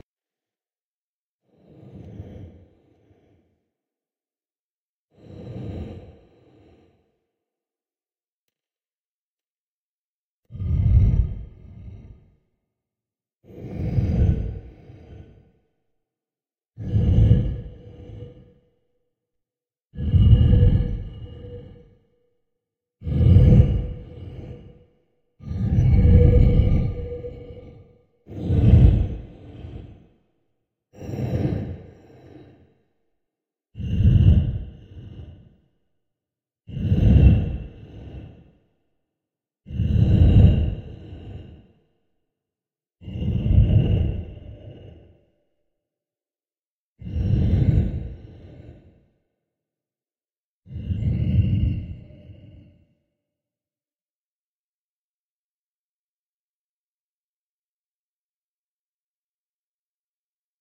signalsounds for dark scary sound design